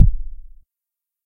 AO Subtractor Kickdrum 4 1
Inspired by a discussion on the Ambient Online forum:
Used the Subtractor synth from Reason.
Please refer to the pack description for a full list of the sounds synthesized.
AO_Subtractor_Kickdrum_4_1
Finally, a quite complex patch using the same sine osc, this time with a triangle osc mixed in tuned 5 semitones lower than the sine osc and mixed in 69% sine +41% triangle. Amp env Decay 54.
The noise osc is also used, Decay 42, Colour 95, Level 60.
And a Low-pass filter Freq 29, Res 0 with keyboard tracking and env amout set to max. Filter env Decay 28.
This sounds certainly different (but not necessarily better) than the simpler patches. Also note that use of the filter + filter envelope messes with the lenght of the sound, so you may need to increase the Amp env decay or the sound might become too short.
bassdrum, kickdrum, Subtractor